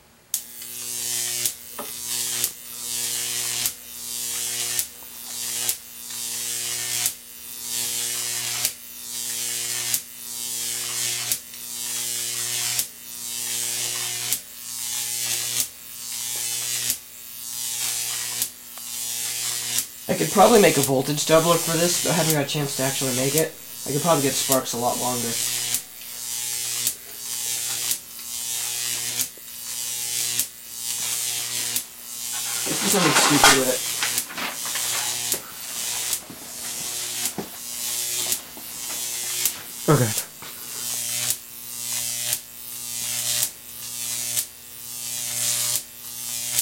Long recording of a Jacob's Ladder constructed by a friend of mine. Near the end of the recording, the arc crosses through a sheet of paper several times.
This was taken from the audio track of a video shoot. Recorded with the internal microphone of a Sony DCR-TRV8 Handycam.
Still frame from the video, showing a close-up of the paper:
arc, buzz, crackle, effects, electric, electrical, electricity, flickr, fx, shock, spark, unprocessed, zap